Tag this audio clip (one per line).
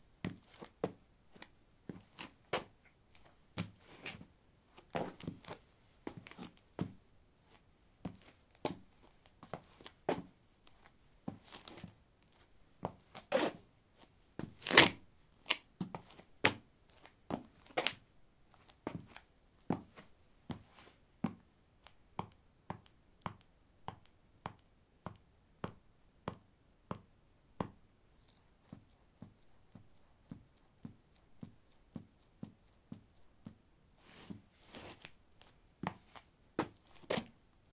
footstep
footsteps
step
steps